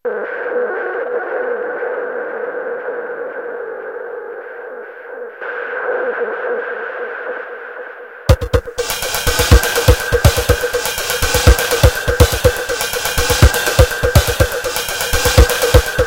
Shut Down
ambient, bent, circuit, drums, roland